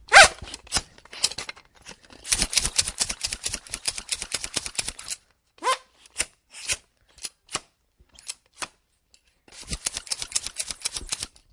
Here are the sounds recorded from various objects.
bicyclePump, France, LaPoterie, Mysounds, Rennes